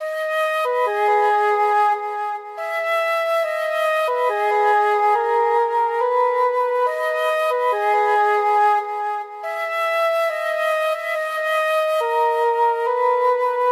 dark drill flute melody 140bpm
Uk drill loop ,140bpm
Nothing really...still tryna find it
Ukdrill, dark, drill, flute, orchestral, windimstrment